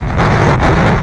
skatetruck remix
a remix of a sample in cubase sx. pitched lower and slower and dirtied up